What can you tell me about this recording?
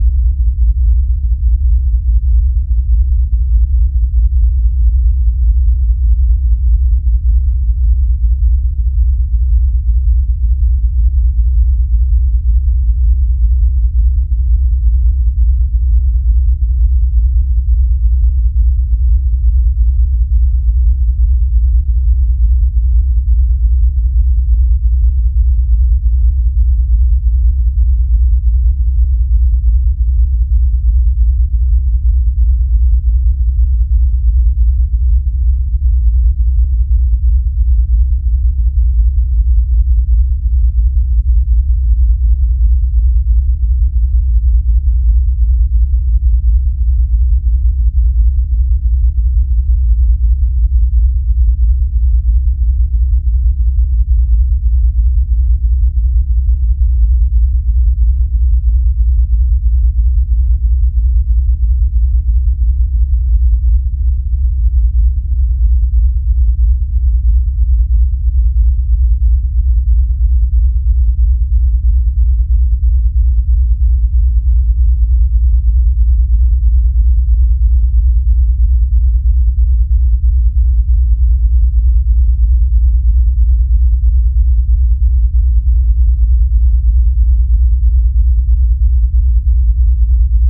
system 100 drones 5
A series of drone sounds created using a Roland System 100 modular synth. Lots of deep roaring bass.
background, vintage-synth, deep, drone, low, Roland-System-100, analog-synthesis, modular-synth, ambience, bass, synthesizer, bass-drone